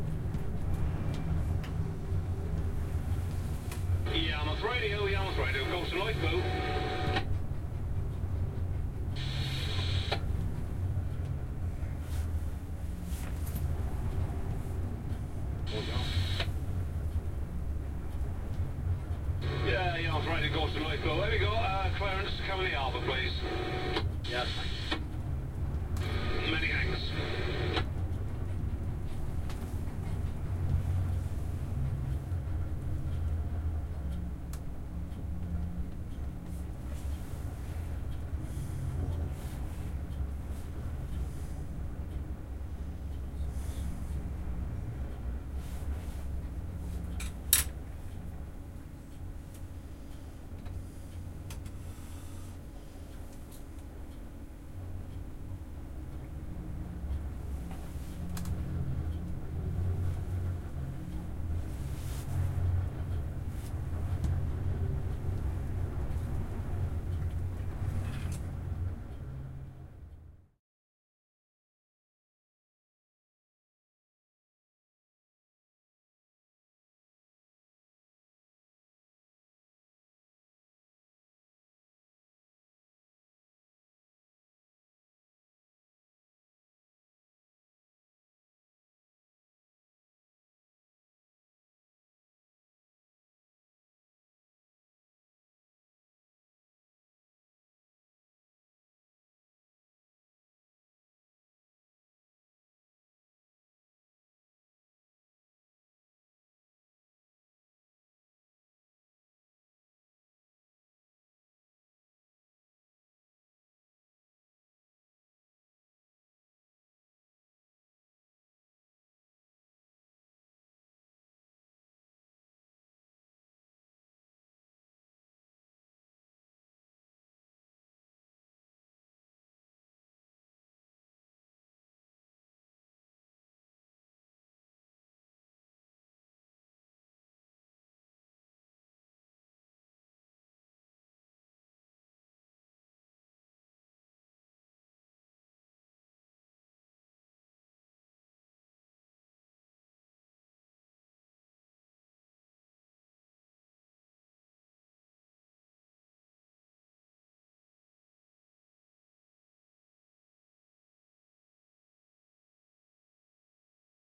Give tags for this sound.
windy; martime; radio; lookout; station; wind; coast; winter; coastwatch; Norfolk; beach; coastguard; sea; tower; watch

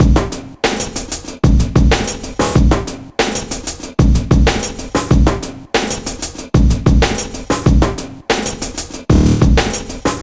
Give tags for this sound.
drum; drumloop; breaks; beat; breakbeat; loops; hop; breakbeats; drumloops; drum-loop; snare; beats; break; hiphop; loop; hip; drums

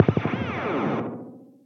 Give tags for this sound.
alien; amplifier; amp-modelling; amp-VST; arifact; beam; experimental; FX; game; laser; Revalver-III; sci-fi; virtual-amp; weapon

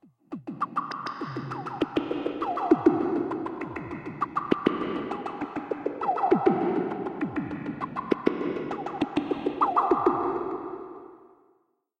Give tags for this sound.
100bpm; groove; loop; multisample; rhytmic; sequence

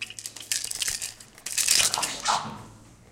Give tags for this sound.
Germany; SonicSnaps; School; Essen

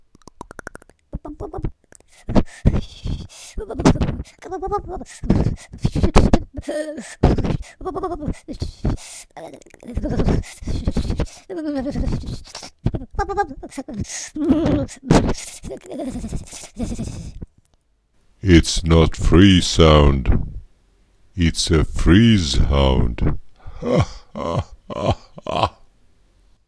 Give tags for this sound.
no; outbooed; reward; stars; worthless; zero

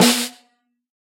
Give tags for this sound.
1-shot; drum; multisample; snare; velocity

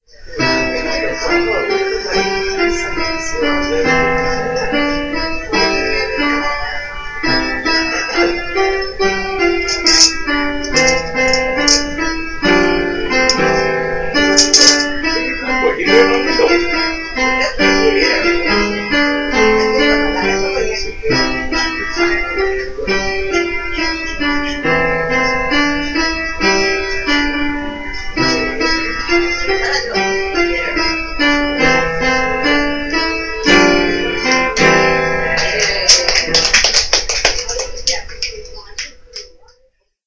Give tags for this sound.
2013; applause; Beethoven; instrument; live; music; ode-to-joy; old; piano; recording

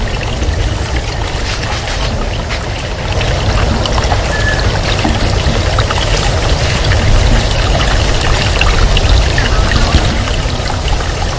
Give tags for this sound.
aigua; Llobregat; nature; sound; water

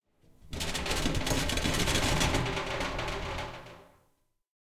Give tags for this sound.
glass; metal; noise; plastic; rumble; rumbling; shaking

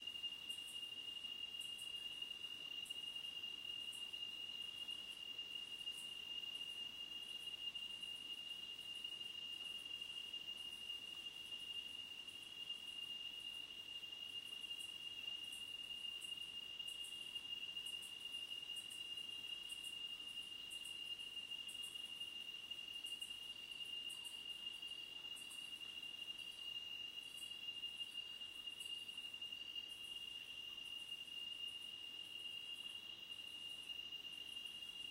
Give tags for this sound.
ambiance; ambiant; crater-lakes-rainforest-cottages; crickets; dark; field-recording; night; outdoor; quiet; quietude; rainforest

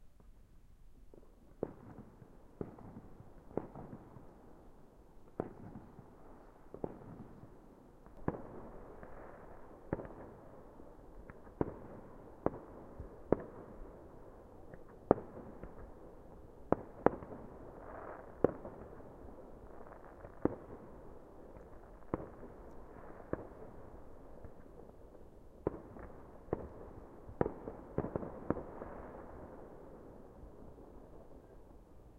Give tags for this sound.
ambience; field-recording

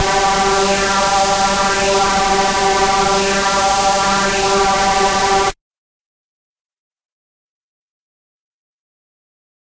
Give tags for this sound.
reese; processed; distorted; hard

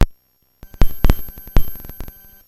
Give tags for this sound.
505; a; beatz; bent; circuit; distorted; drums; glitch; hammertone; higher; hits; oneshot; than

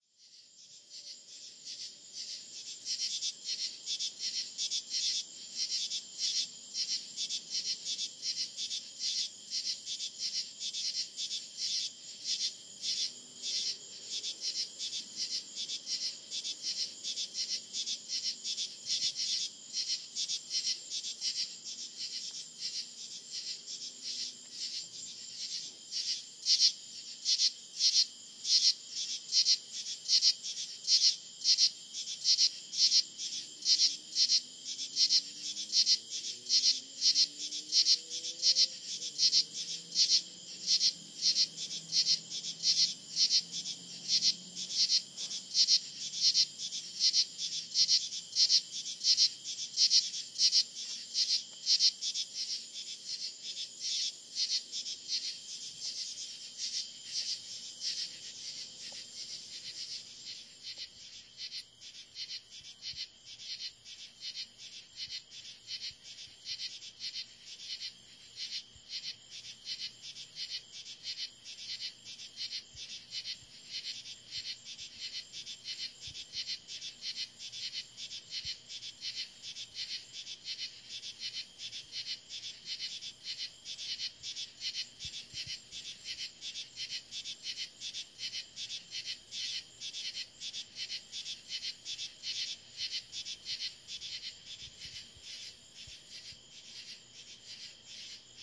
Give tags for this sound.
katydids; July; field-recording; ambiance; midwest; crickets; chorus; Summer; sleep; insects; peaceful

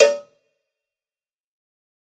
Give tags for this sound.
cowbell; dirty; drum; drumkit; pack; realistic

